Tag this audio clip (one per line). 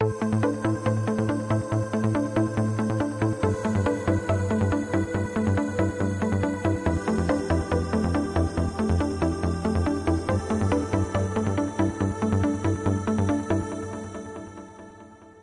LMMS
trance